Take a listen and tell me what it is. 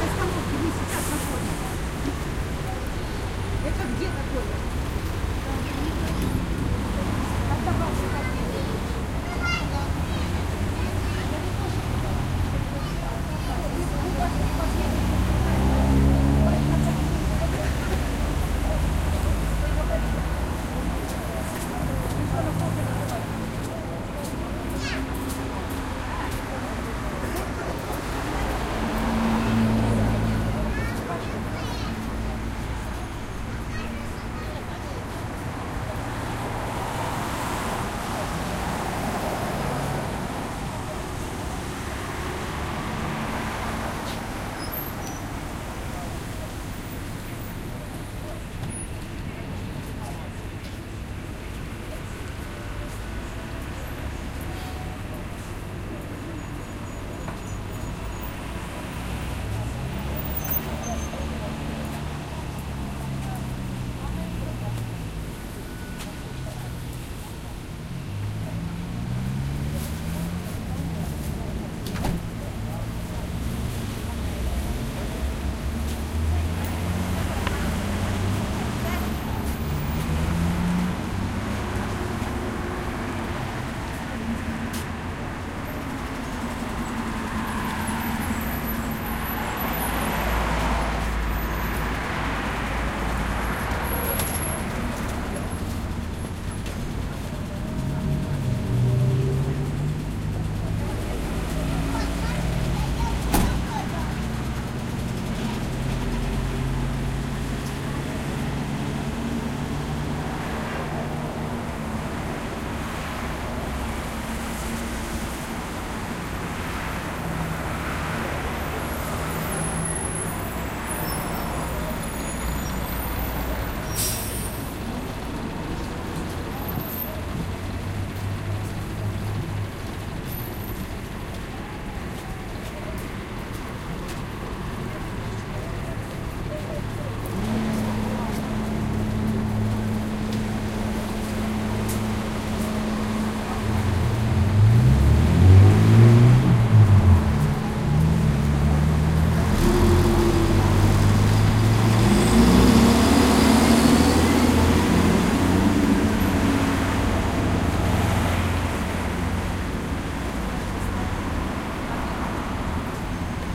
Just a small part of a bus_stop's day.
I used Zoom h4n. Location: Russia, Voronezh